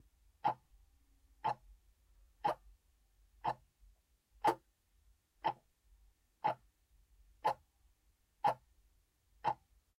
The soft sounding alarm clock on the bedside table. I like it. The microphones are placed about 5 cm apart from the clock.
I added a little noise reduction.
Recording machine Zoom F4
Microphone 2 Line-audio OM1
software Wavelab
plug-in Steinberg StudioEQ